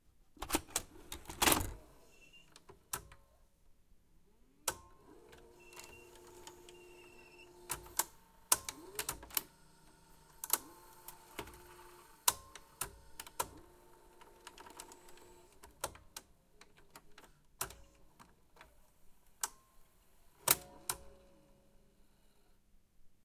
Inserting a VHS cassette into a Philips VR6585 VCR. Recorded with a Zoom H5 and a XYH-5 stereo mic.